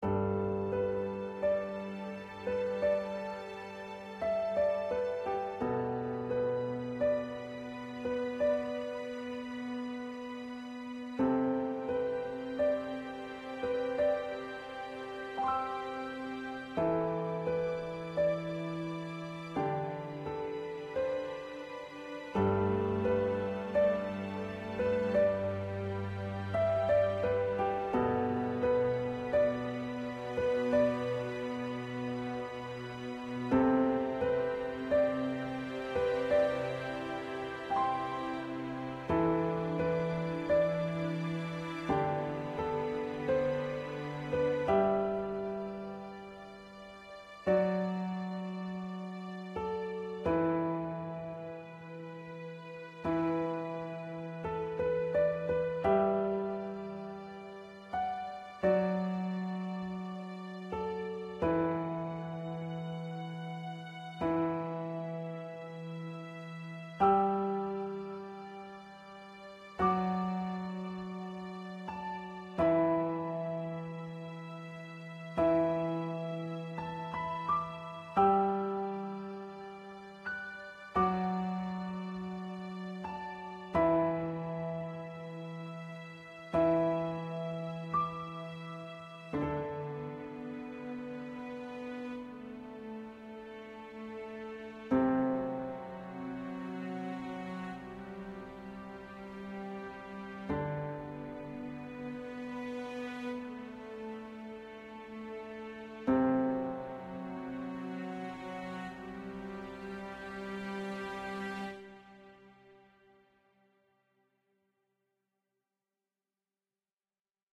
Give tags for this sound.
Drama
Orchestra
Piano
Sad
String

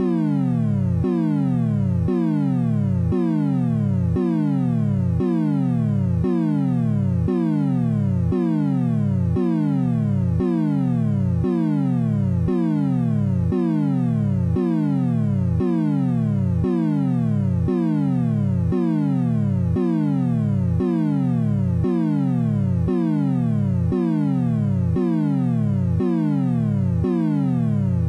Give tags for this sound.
weird
sci-fi
sound-design
games
starfield
synth
space
effect
arcade
eeire
video-games
fx
Galaga
strange
dark
Galaxian
abstract
synthesis

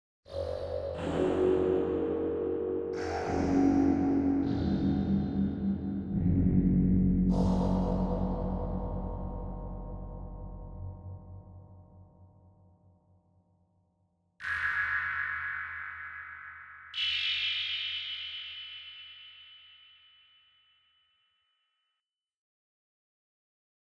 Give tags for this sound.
ambiance
creepy
Drone
thrill
movies

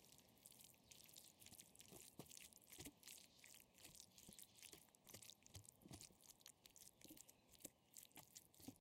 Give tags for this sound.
chicken
kitchen
bowl
owi
food
squelch
fork
rice

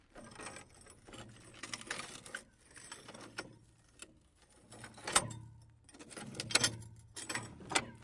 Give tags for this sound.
softly
handling
Metal
objects

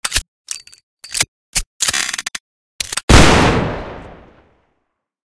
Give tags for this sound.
army
military

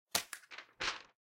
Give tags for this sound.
effect
paper
pickup

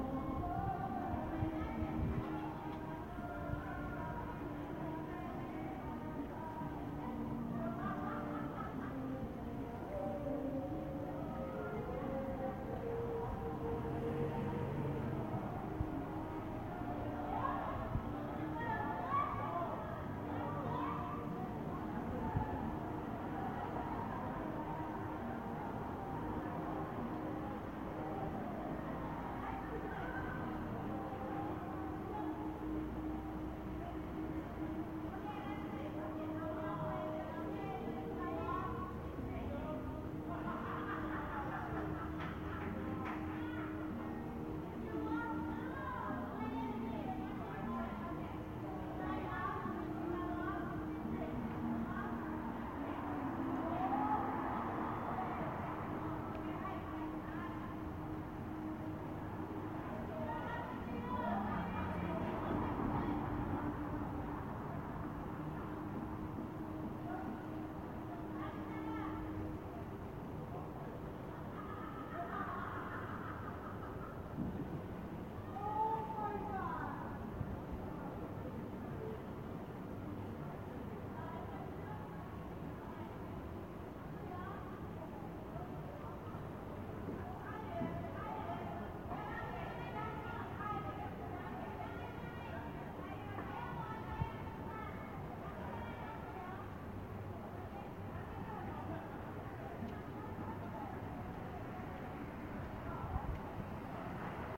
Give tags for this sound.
field-recording
japan
ambiance
street

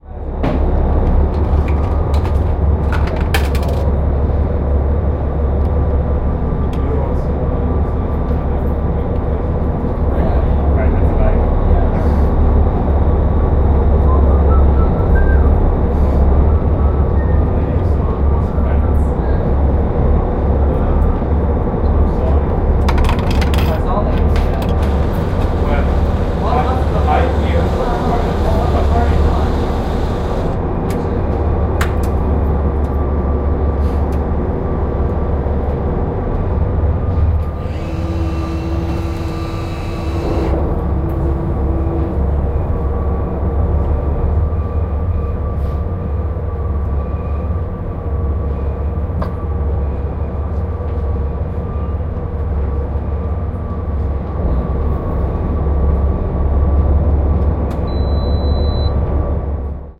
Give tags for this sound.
coffe
expresso
ferry
genova
machine
whistle

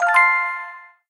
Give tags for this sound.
win
positive

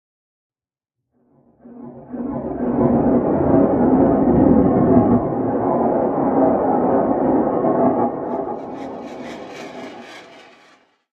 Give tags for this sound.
reverb
suspense
atmos
sfx

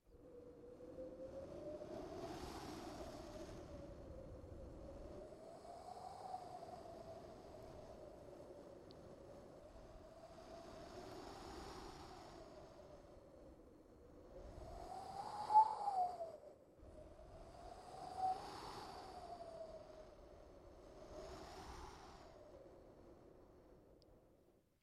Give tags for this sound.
fx
effect
wind
dare-19
beatbox

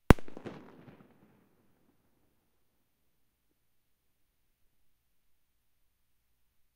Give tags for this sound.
Fireworks
Explosion
Bang
Firecrackers

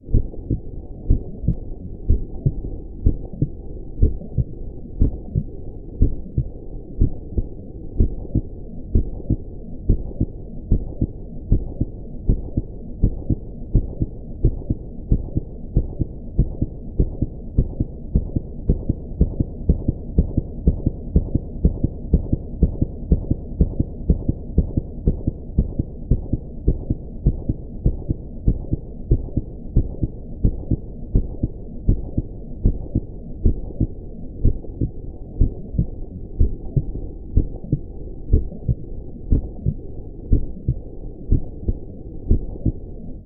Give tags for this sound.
anticipation
excercise
exertion
foreboding
heart-beat

